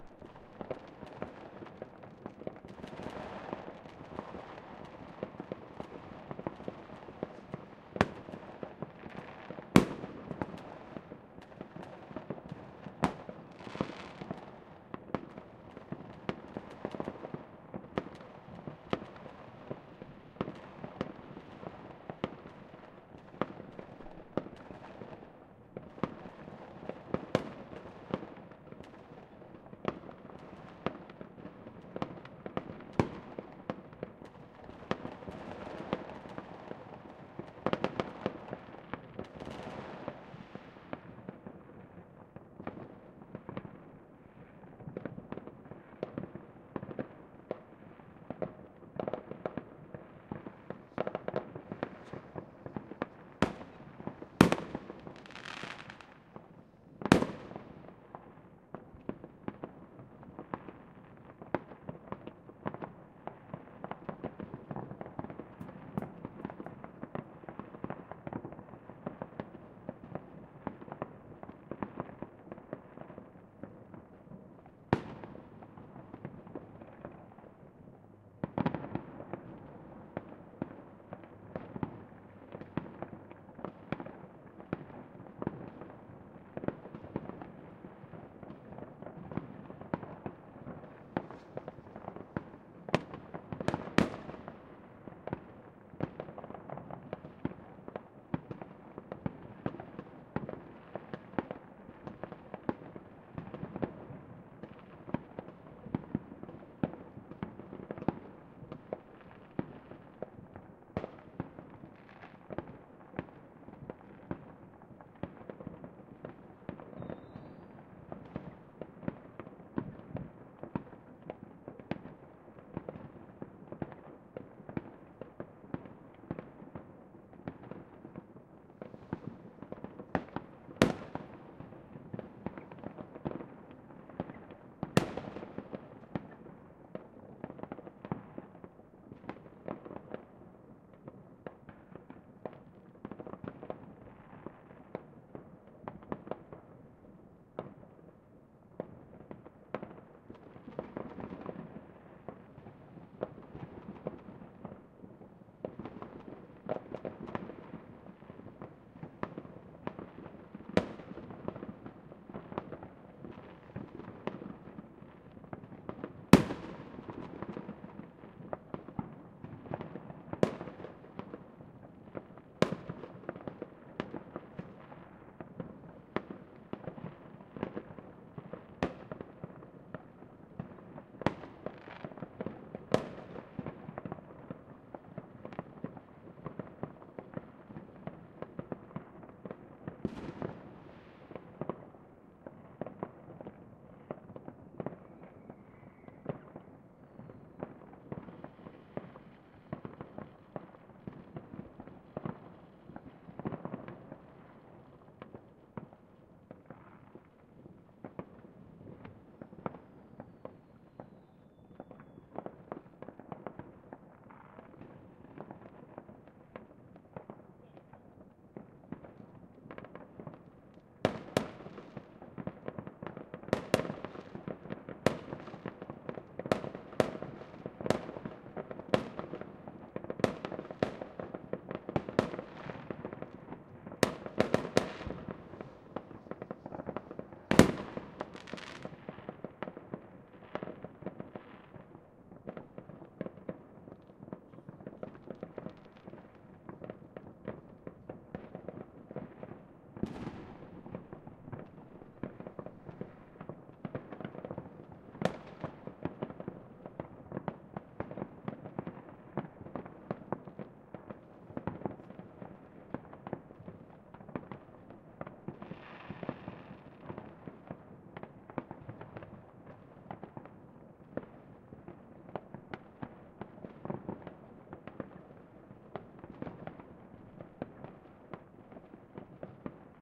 New year fireworks